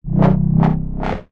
Dub Wah C3 3x 140bpm
A wahwah saw made in Sytrus (FL Studio). 140bpm in C3. Left raw and unmastered for your mastering pleasure.
3x, C, C3, dirty, dub, dub-step, dubstep, effect, electro, electronic, FL, fx, rough, saw, studio, synth, synthesizer, sytrus, techno, wah, wah-wah, wahwah, warble